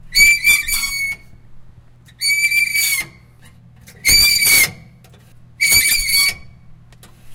Metallic Screech
This is a recording of the iris in a spot light that badly needs lubrication. It is an ideal metal-on-metal screech. This is a series of recordings that is best when split into your favorite individual screech.
metal, spot